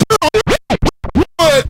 Cut scratching a vocal phrase. Technics SL1210 MkII. Recorded with M-Audio MicroTrack2496.
you can support me by sending me some money:
battle, beat, chop, cut, cutting, dj, hiphop, phrase, record, riff, scratch, scratching, turntablism, vinyl, vocal